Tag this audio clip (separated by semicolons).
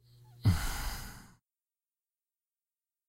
despair; desperation; despondence; forlornness; hopelessness; human; male; man; vocal; voice; wordless